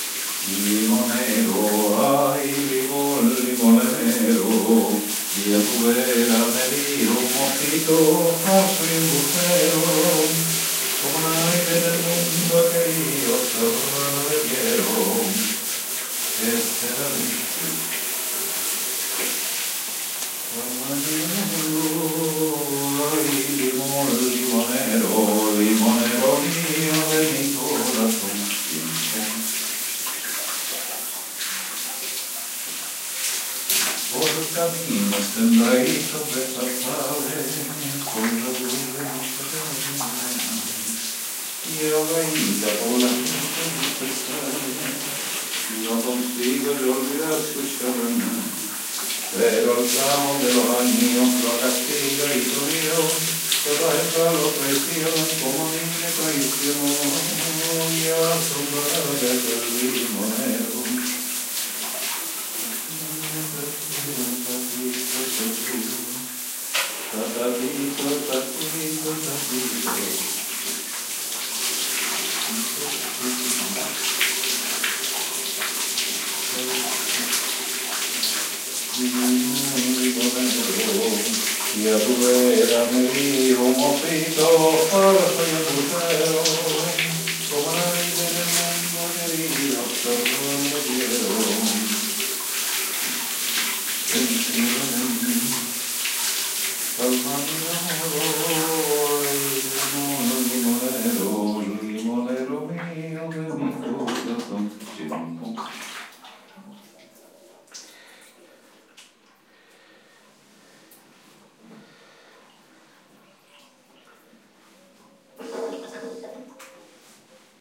20110804 shower.singing.20
while having shower Santi sings a popular Spanish tune. PCM M10 recorder with internal mics
bathroom, male, shower, spanish, voice